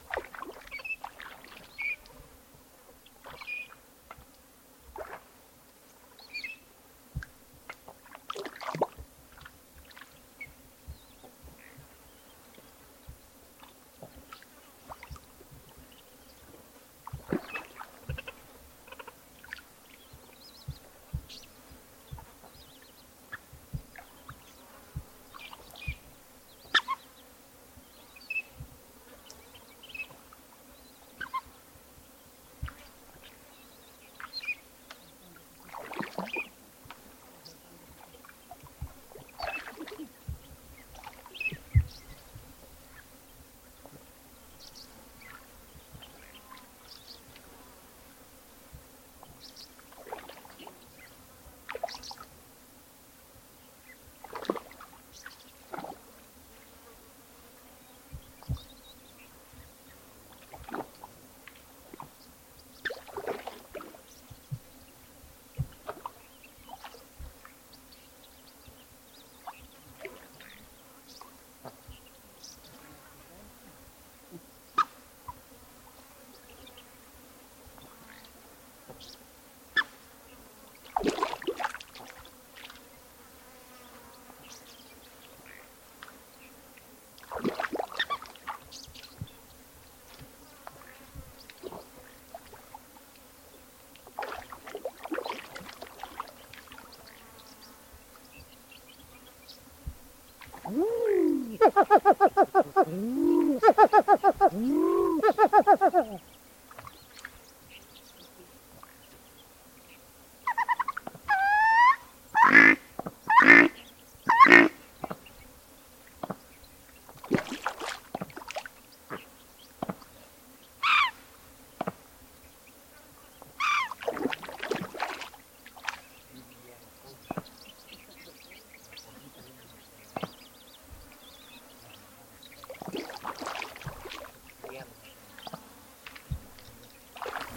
AMB orillas del lago
Birds and wildlife next to a body of water in the chilean highlands near the bolivian border.
Rec: Tascam 70D
Mic: Sennheisser MKH-416
Post: some NR with RX3 Denoiser
Fauna aviar junto a un gran cuerpo de agua en el altiplano.
Grabado en una Tascam 70D con un Sennheiser MKH-416.
Un poco de reducción de ruido con RX3 Denoiser.
birds, altiplano